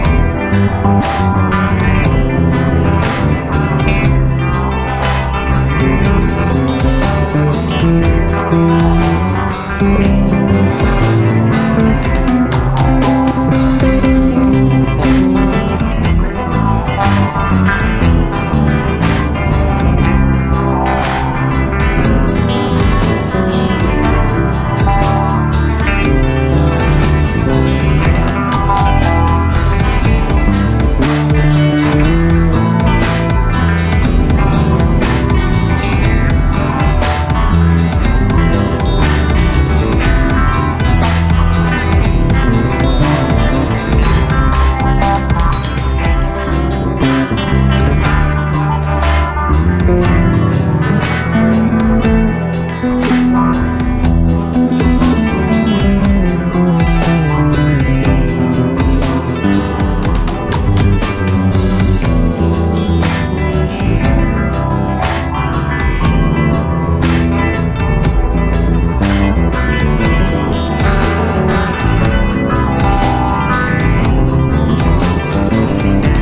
A loop cut from one of my original compositions.